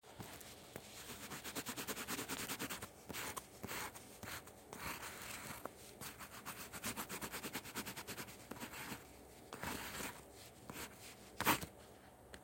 Sound of me drawing with a pencil.
writing, paper, newspaper, picasso, drawing, Pencil, art